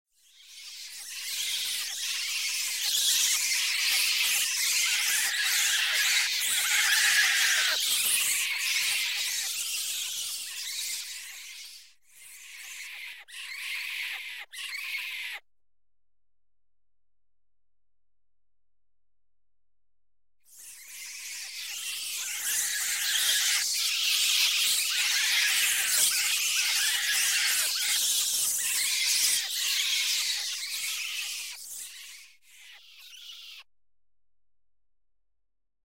2 takes bats
bat, cries, halloween, processed, 2, screams, versions, suspense, bats, eery, high, scream, flock, fx, critters, high-pitched, horror